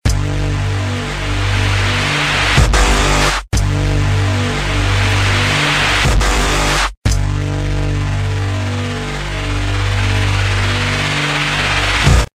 TAPP Sample
An electronic sample that transposes well and is fairly easy to chop and copy.
electronic, sample, synth, techno